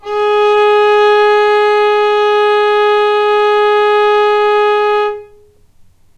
violin arco non vib G#3
violin arco non vibrato
arco, non